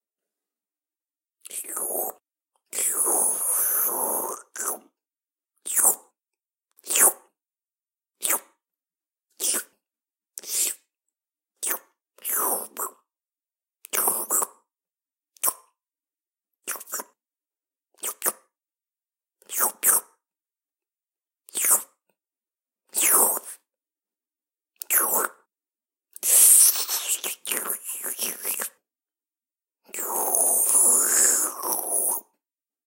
slurping (for animation/game)
These are just a few slurping sounds. I created them with the game in mind, so they are specific.
Pre-cleaned in Audacity and ready for editing.
game, eating, chew, slurp, eat, slurping